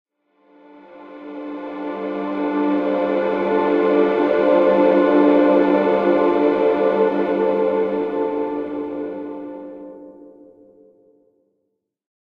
Lifetime Movie
Gentle chord-like pad sound.